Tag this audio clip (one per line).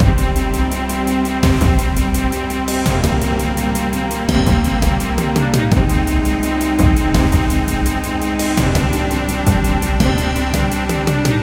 war
battle
fighting
army
loop
triumph
film
trailer
energetic
epoch
epic
movie
fight
military
triumphant
cinema
combat